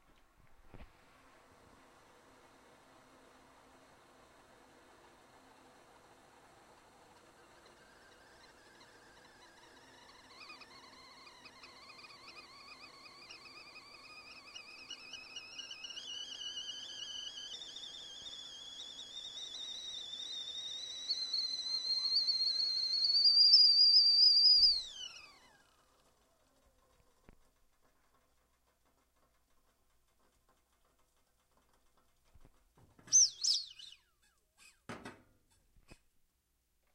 A teapot kettle whistling then taken off the stove.